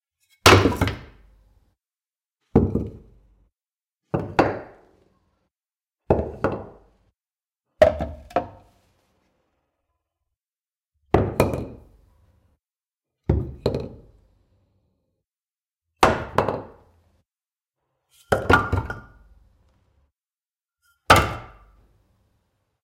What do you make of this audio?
piece of wood thrown or dropped
Some pieces of wood being dropped or put down to a wooden floor several times.
Recorded with Oktava-102 microphone and Behringer UB1202 mixer desk.